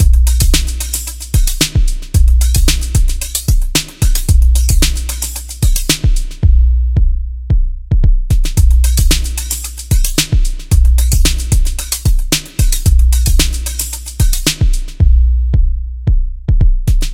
112bpm Underground Breakbeat loop
112bpm Underground sound breakbeat, loops perfectly. Enjoy in your creative projects. Made in FLStudio20 with standard drums and my Roland Boom kick. Included loop, special thanks to Bitbeast